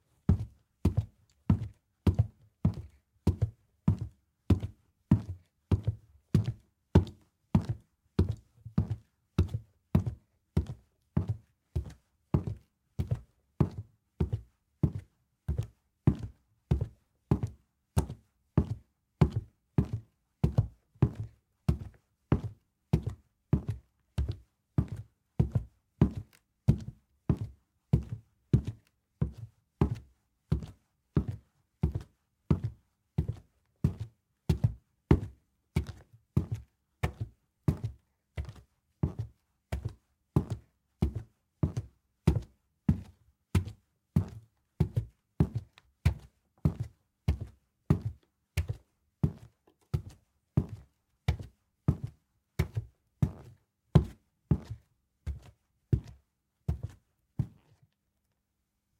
Footsteps Walking On Wooden Floor Medium Pace
Trainers, Sneakers, Quiet, Man, Shoes, Floor, Boots, Foley, Hard-Floor, Walk, High-Heels, Walking, Concrete, Wooden, Staggering, Woman, Footsteps, Hardwood-Floor, Trousers, medium-pace, Fabric, Clothing, Running, Heels, Wooden-Floor, Wood, medium-speed, Asphalt